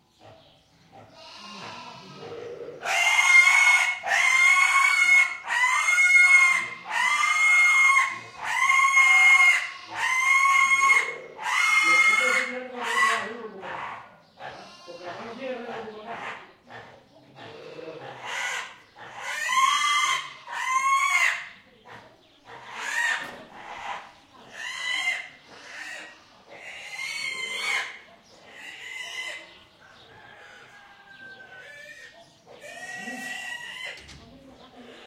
Content warning
not for the faint of heart, young pigs' slaughter. Recorded in a country house's open yard near Cabra, S Spain. Sennheiser ME66 + MKH30, Shure FP24 preamp, Edirol R09 recorder. It was very hard for me to record this so I hope it's any use (should be hard to synthesize, I guess...)
EDIT: I feel the need to clarify. This is the traditional way of killing the pig in Spanish (and many other countries) rural environment. It is based on bleeding (severance of the major blood vessels), which is not the norm in industrial slaughter houses nowadays. There stunning is applied previously to reduce suffering. I uploaded this to document a cruel traditional practice, for the sake of anthropological interest if you wish. Listeners can extract her/his own ethic/moral implications.
animal, butchering, death, field-recording, horrific, meat, nature, pig, scream, slaughter, squeal, suffering